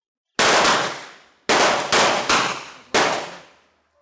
Revolver calibre 38 - vários disparos 02
Vários policiais disparando com revolver calibre 38.
shot, gun, weapon, shooting, gunshot, revolver, armas, tiros, pistol